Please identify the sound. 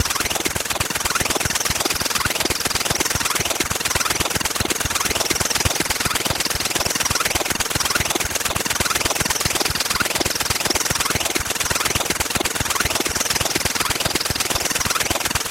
Mechanical flanging rotary loop.
factory, industrial, robotic, rotary, machine, machinery, mechanical, noise, flanger, loop, robot